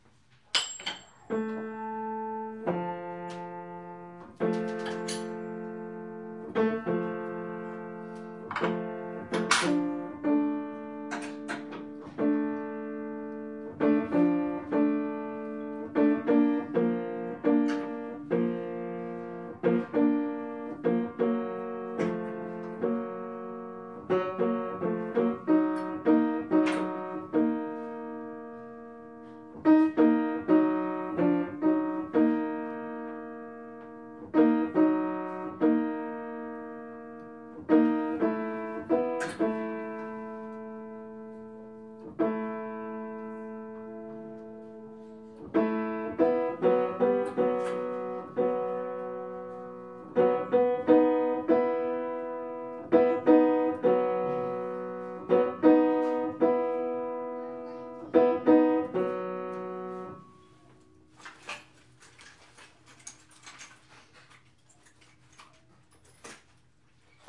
Piano Tuner 1
Piano Tuner
recorded on a Sony PCM D50
xy pattern
Piano, Tuner, Upright